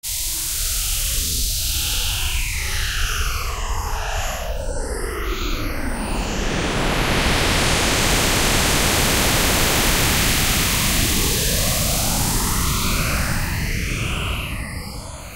burning static
A few thick gradiented lines in the spectrogram as well as a lot of little dots, which made a sort of gritty sound at the same time as what I can only describe as a distorted welding sound.
VirtualANS, sci-fi, electronic